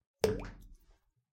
Water Blup Sound Effect Hits
Sound, Effect, Blup, Hits